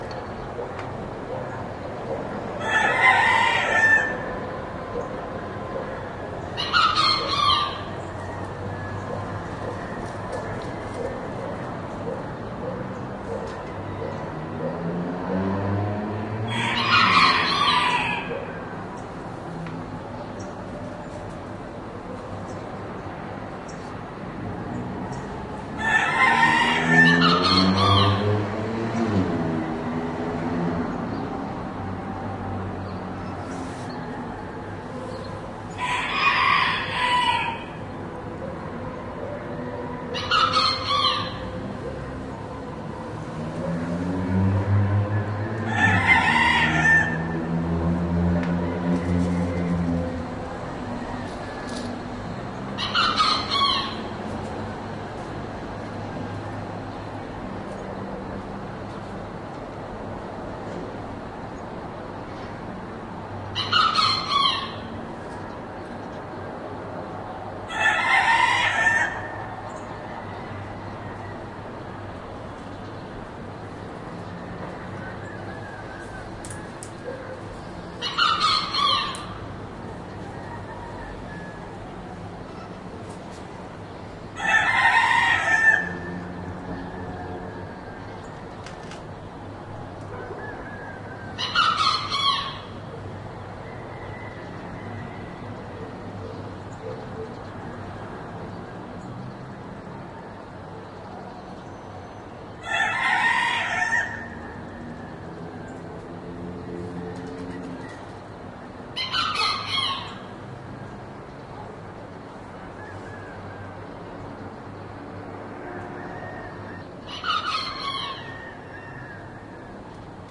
20101205.03.dawn.rooster.n.traffic
Two roosters crowing at dawn, one has a really weird voice. Soft traffic noise in background. Recorded at La Paz downtown, Baja California, Mexico with Shure Wl183 mics into Fel preamp and Olympus LS10 recorder.
ambiance, city, cockerel, crowing, field-recording, rooster